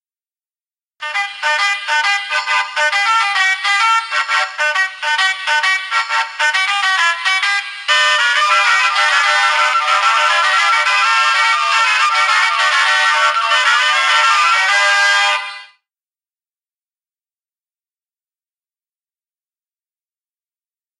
Here is the first song in my new pack. The Mexican Hat Dance. I'd love to know. This sound was recorded from a musical horn that plays 3 songs. The exact record date is sadly unknown, but I know these are older recordings.
Song, Music